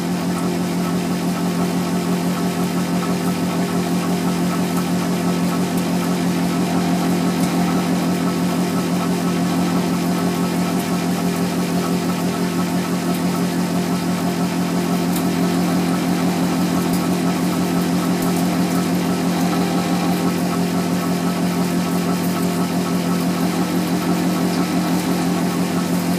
Engine Running Loop
Loop of some sort of engine or fridge running. (It's actually the sound of the microwave oven in my kitchen running.) Recorded with a 5th-gen iPod touch. Edited with Audacity.
engine, loop, machine, mechanical